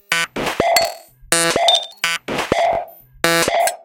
ElctroClacks 125bpm03 LoopCache AbstractPercussion
Abstract Percussion Loops made from field recorded found sounds